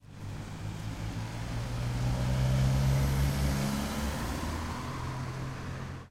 moto passing
A motorcycle passing by
moto, motorcycle, trafico